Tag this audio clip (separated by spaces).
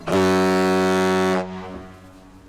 honk ferry boat ship truck foghorn train hooter horn